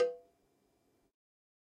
MEDIUM COWBELL OF GOD 006
pack, god, more